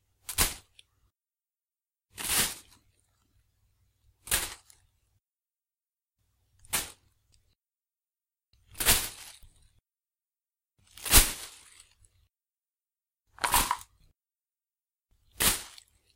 Throwing small objects into a plastic bag
A series of small objects being tossed into a plastic bag. Created to simulate the sound of candy being dropped into a bag on Halloween.
Recorded by literally tossing a series of small objects into a plastic bag in front of my microphone and pressing the big red button in Audacity.
bag, crinkle, Halloween